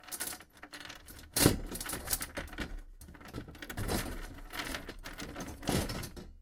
Abstract Sound part 1.
clank, fiel-recording, jangle, machine, mechanical, rale, soundscape, strange